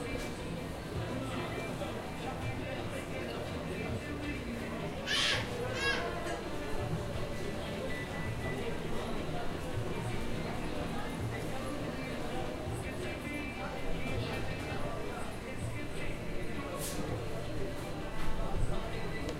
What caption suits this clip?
fez streetcorner music people
Street corner in Fez, Morocco. Some music playing nearby